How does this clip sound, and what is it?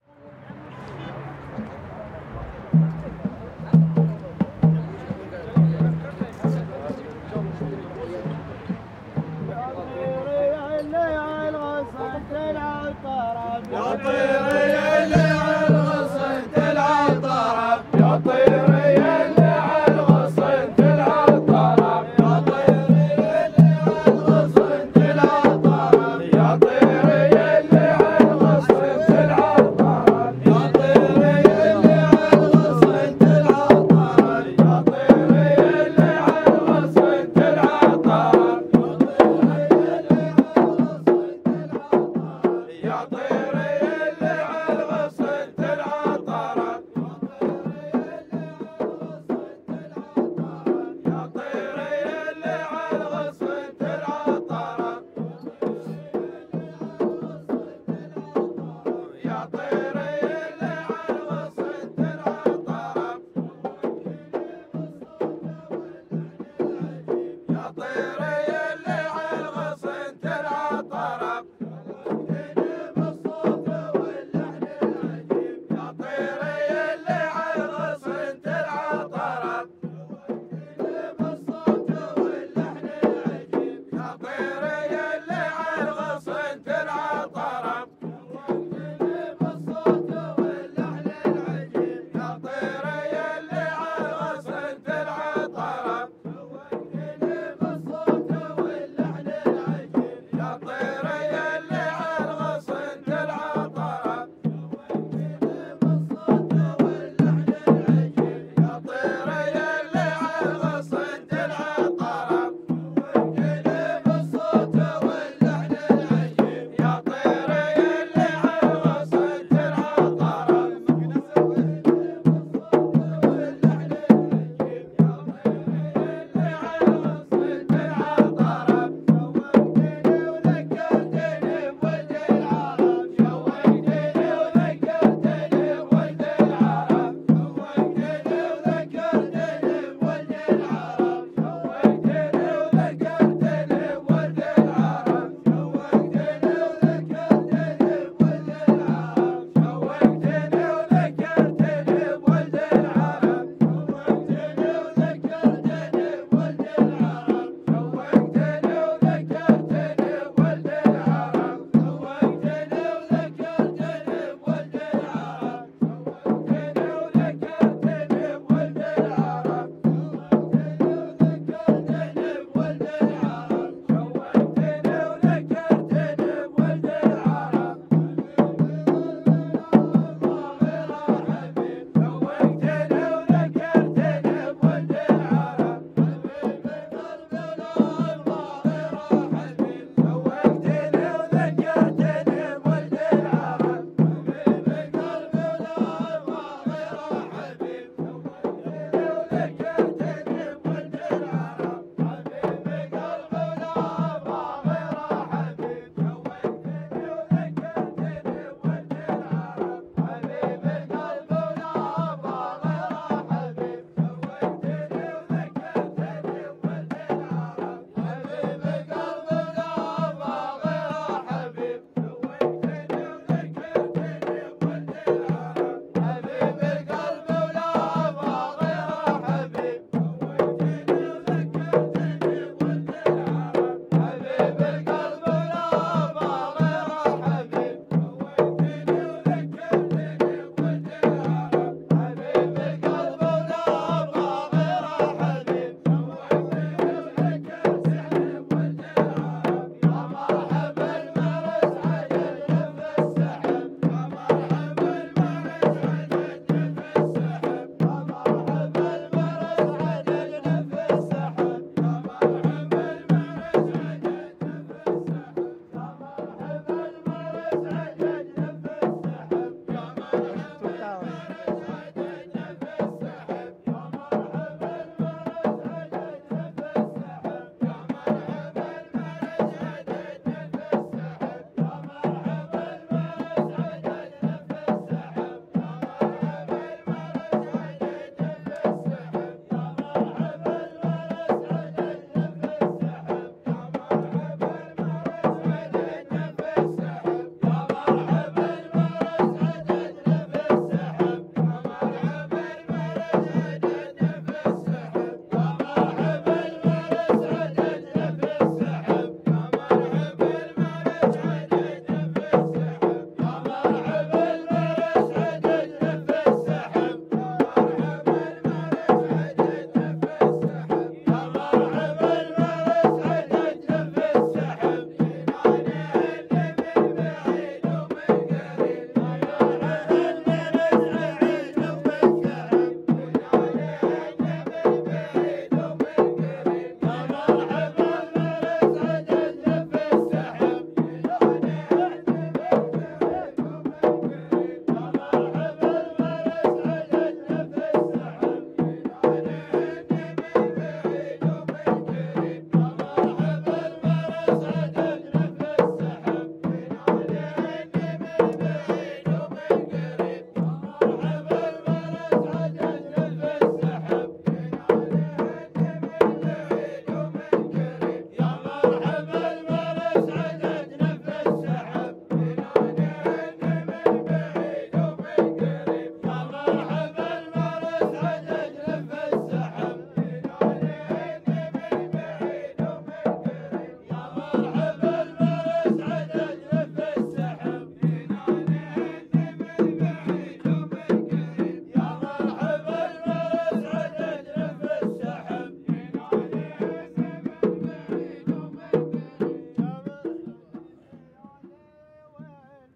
Wedding song
Arabic, Drums, grooup, men